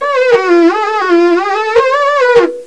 Nebulous horn chant on gourdophone
Recorded as 22khz
gourd, handmade, invented-instrument